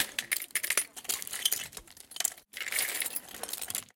perc-legostore-helsinki
Lego store noises in Helsinki, recorded at Zoon H4n. This sound was editing & posprocessed.